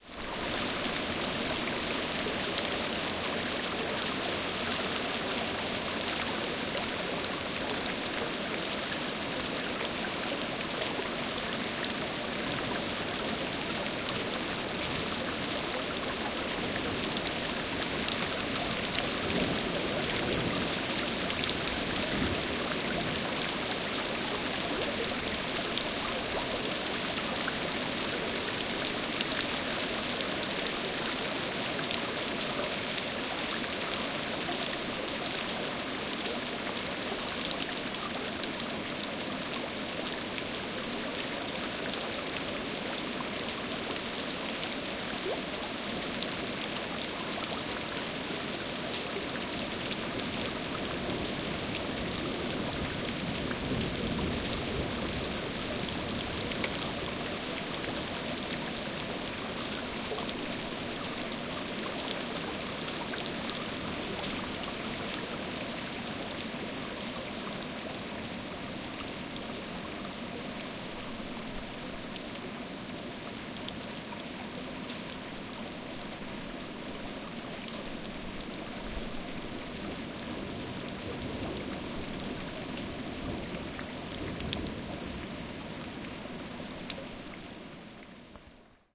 Rain is falling on the river Vltava in Prague. Later in the recording, a distant thunder is audible. Recorded with an Olympus LS-11.